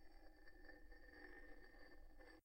Scratch between a block of metal and another. Studio Recording.